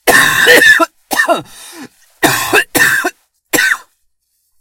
Me coughing.
Thank you!
cough, coughing, male-cough